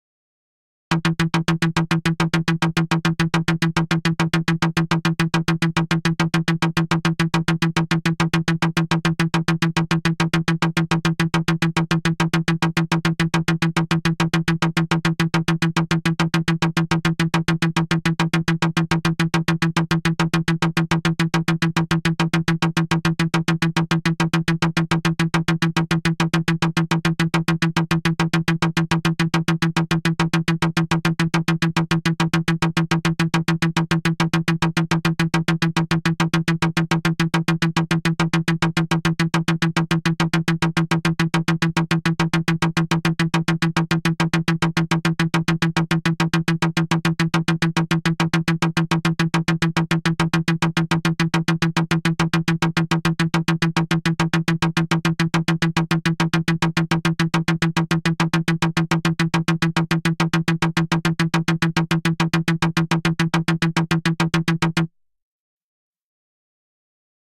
Some recordings using my modular synth (with Mungo W0 in the core)
Mungo, Synth